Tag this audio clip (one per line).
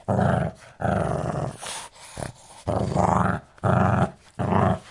Animal
Dog
Growl
Growling
Grumble
Grumbling
Shih-Tzu
Snarl
Snarling